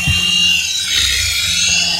Engine Being Stressed
Another sound that I made with my remote-controlled helicopter
star-wars, stressed, helicopter, plane, pod-racer, podracer, engine, turn